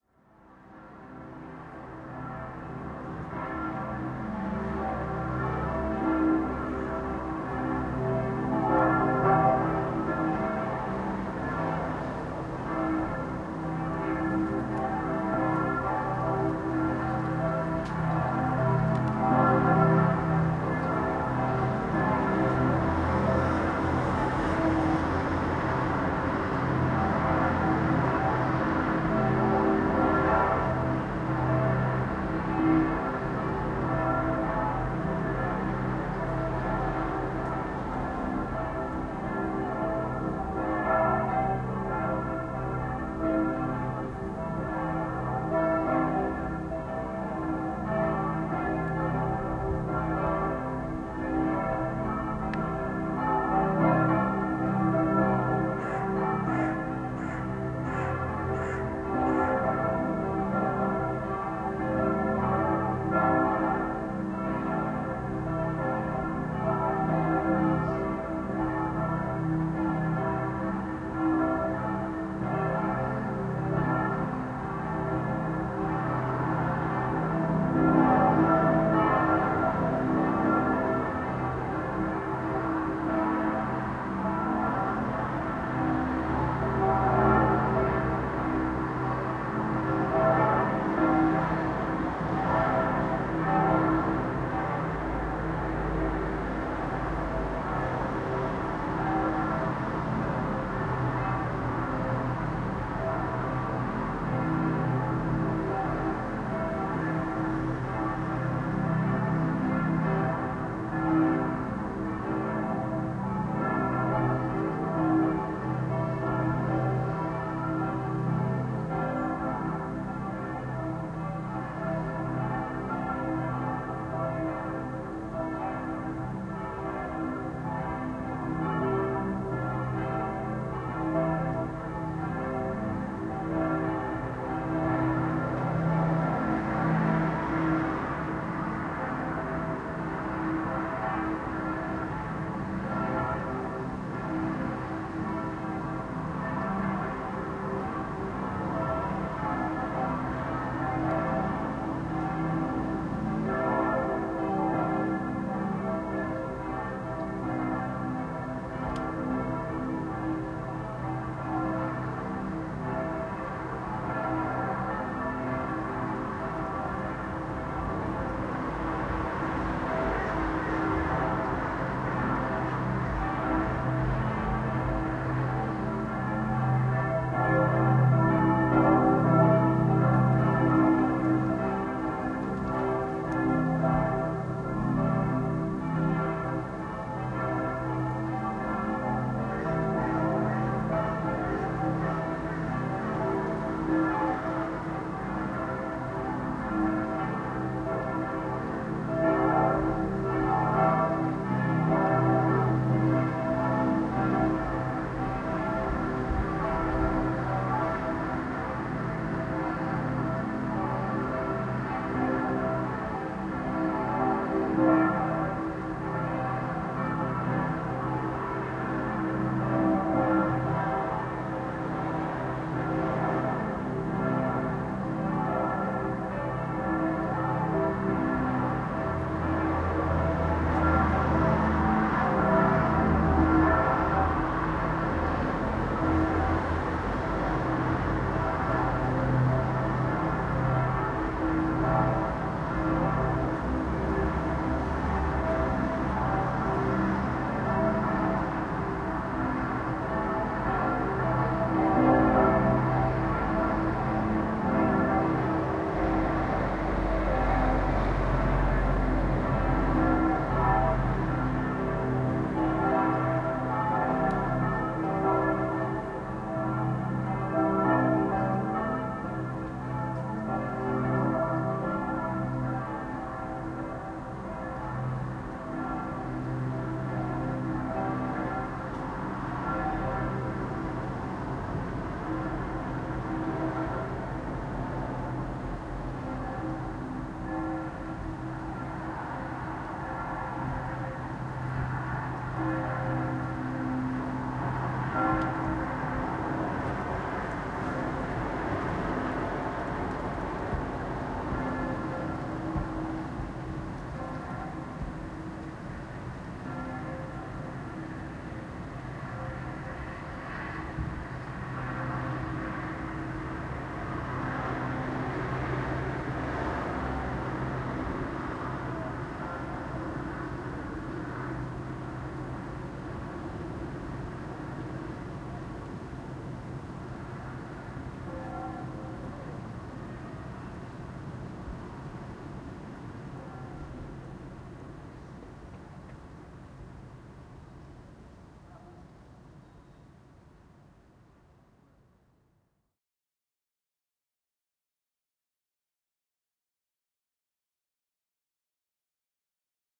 Church Bells, Borgweg, Hamburg, Germany 25th December Christmas
Church bells recorded with Zoom H-1 in Borgweg, Hamburg, Germany in 25th of December 2013.
bell, bells, borgweg, cathedral, christmas, church, church-bell, church-bells, clanging, deutschland, dome, germany, hamburg, ringing